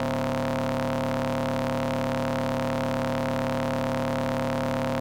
machinery hum
Electronic hum created in Pro Tools.
drone, device, hum, machinery, science